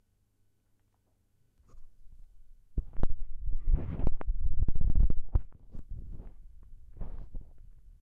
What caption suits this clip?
Making noises with textile. Recorded in XY-Stereo with Rode NT4 in Zoom H4.